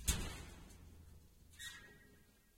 Breaker Door 8 [Slam-Rattle-scrape]
Slamming the door of an old Rusty Electrical Main Cabinet, Slammed with a Loose hand for rattling Effect and pulled for a finishing Scrape.
Field-Recording, Metallic, Rattle, Scrape